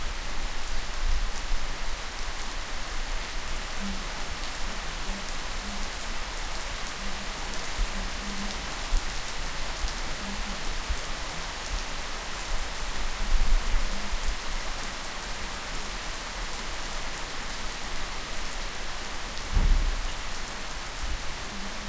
Sound of spring rain.
shower, field-recording, weather, nature, water, rain